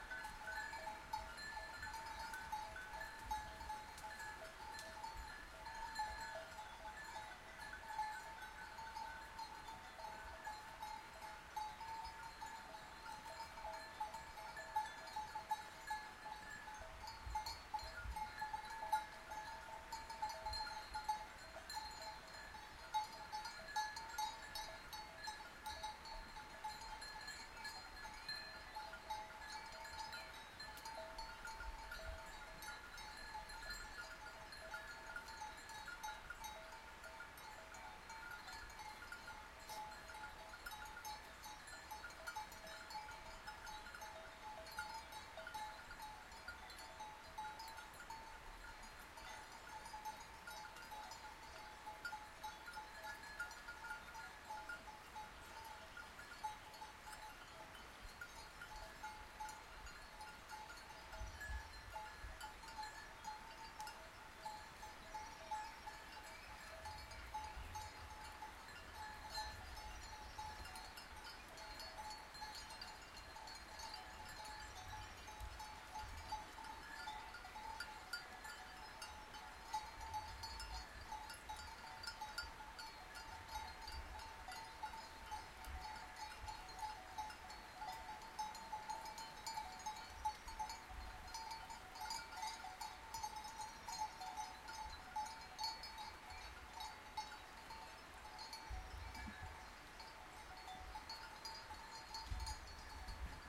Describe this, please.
Flock of sheep grazing calmly near a river in the Spanish Mediterranean. You can hear the bells of the sheep, the nearby river and the singing of the birds.
Recorded in stereo with a Zoom H1